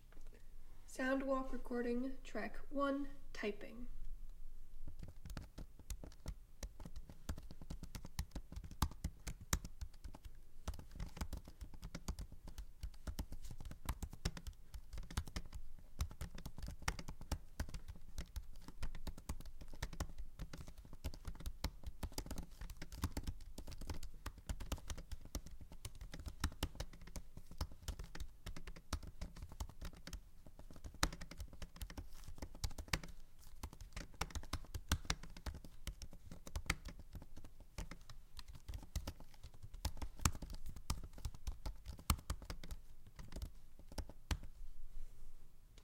Typing on a mac computer keyboard